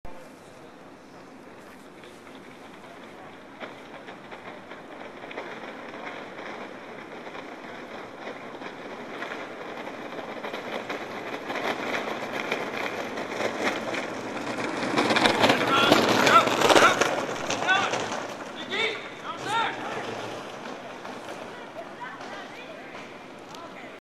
JBF Buggy Race 1 (with crowd)
A buggy approaches and departs with a small encouraging crowd.